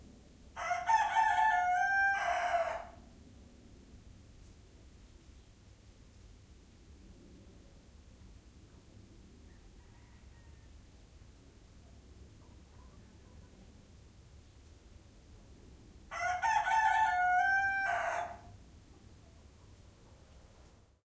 A cock sound in a closed space. Recorded with Edirol R-09 and its built-in microphones.